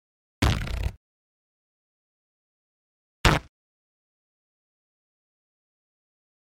paper towel tear perforated
Paper towel being teared, perforated edge.
Recorded with H5 Zoom with NTG-3 mic.
perforated, fast, tearing, paper, towel, tear, slow